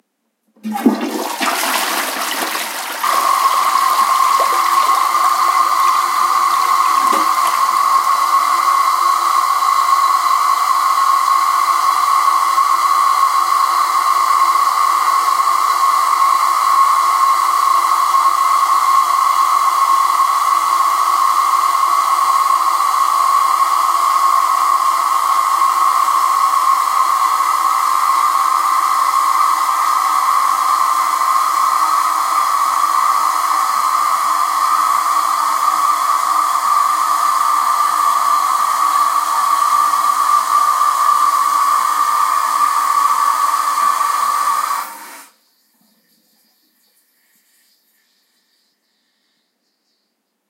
Toilet flush longer
Toilet flushing - longer version.
water, toilet, flush, wc, flushing